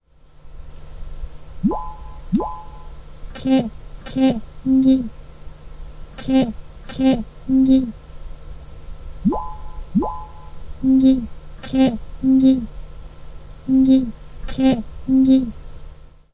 Eye tracker experiment 2
This sound is produced by one of the Eye Tracker experiments at CBC (UPF). These experiments are oriented to newborns and it analyzes their brain cognition by stimuli.
baby, brain, cbc, cognition, experiment, eye-tracker, upf